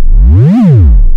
14. One oscillation of bass.
noise, electronic, processed